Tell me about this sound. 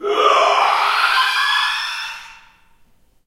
Male Inhale scream 2
Male screaming by inhaling in a reverberant hall.
Recorded with:
Zoom H4n
monster, creature, screak, male, yell, squeal, screech, scream, inhale, squall, animal, schrill, cry, shriek